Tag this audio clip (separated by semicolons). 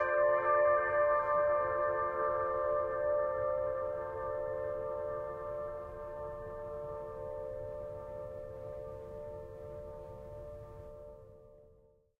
Echo; Piano; Reverb